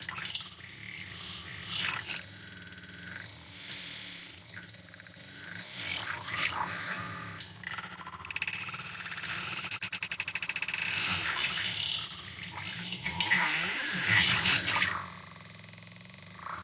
granular synthesizer waterdrops
This is a processed waveform of waterdrops. I made it with fruity loops granulizer. Enjoy :)
synthesizer, liquid, noise, soundeffect, sounddesign, digital, processed, sci-fi, waterdrops, granular, fx, soundesign, abstract, future, sfx, h2o, glitch, strange, water, weird, sound-design, freaky, filtering, pouring, effect, synth